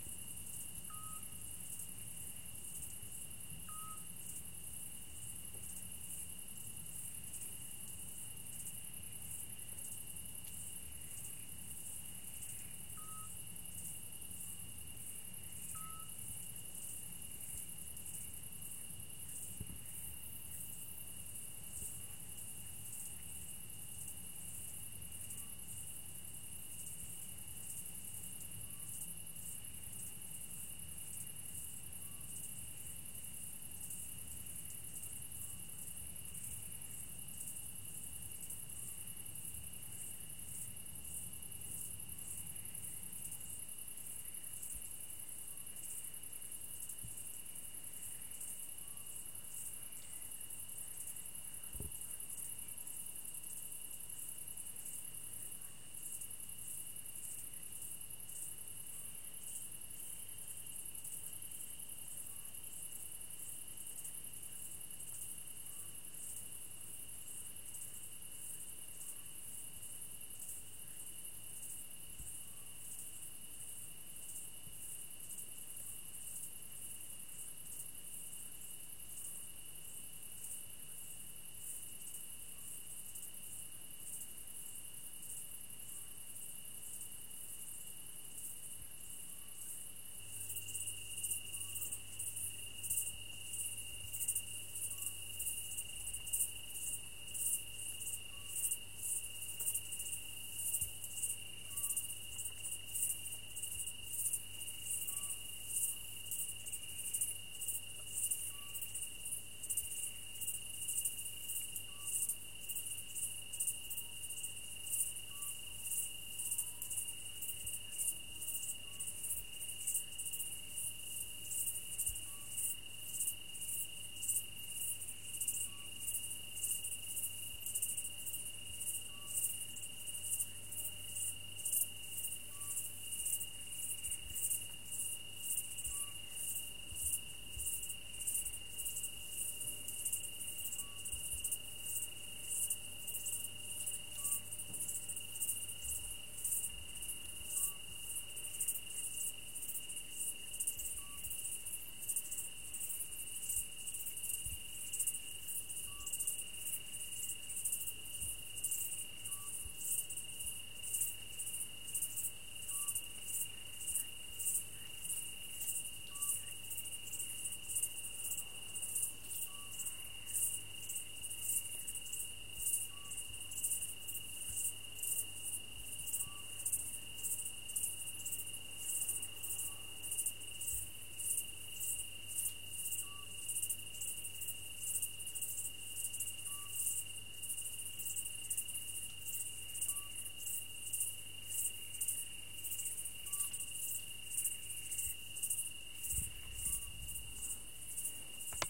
Ambience countryside night 03
Ambience, countryside, field-recording, nature, night